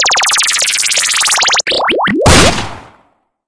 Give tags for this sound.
Bang
Bubbles
Fill
Sound-Effect

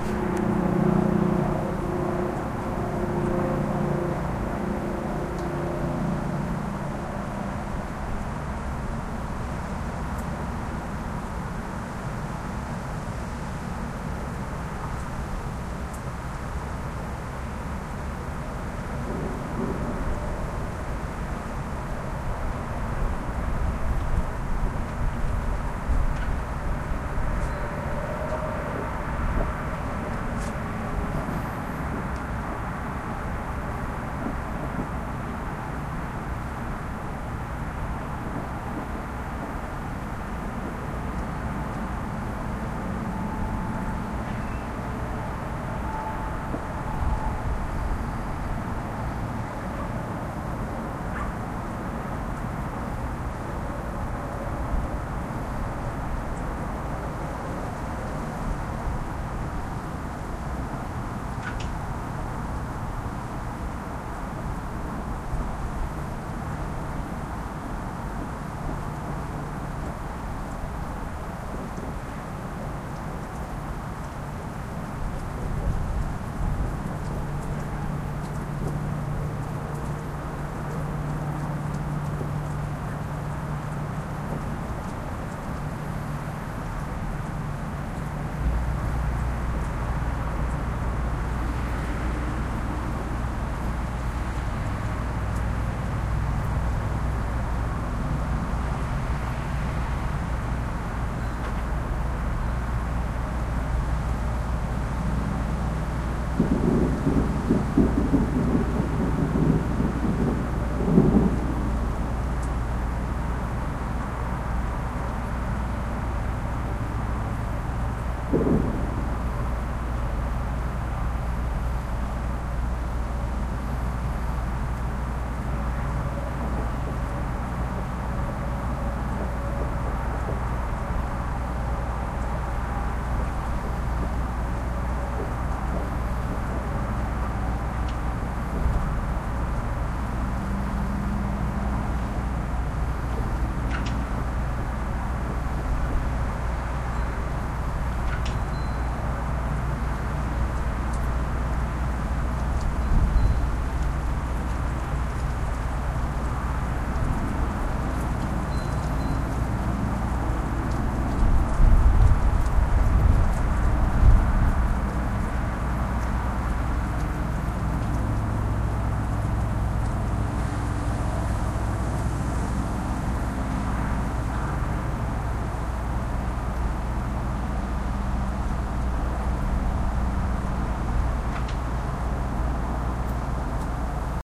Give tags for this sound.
ambient
atmosphere
fireworks
outdoor
patio

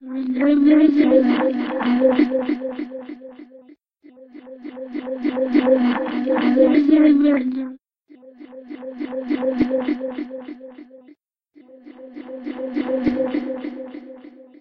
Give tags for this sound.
Voice
Ambient
Weird
Strange
Creepy
Scary
Atmosphere
Cinematic
Sci-Fi

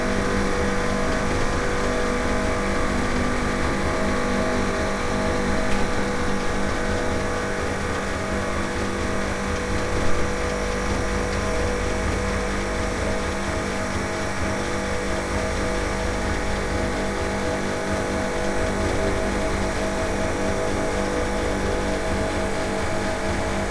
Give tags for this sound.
air-conditioner
electric-machine
engine
fan
freezer
hum
industrial
machine
mid-frequency
motor
motor-noise
noise
refrigerator
ventilation

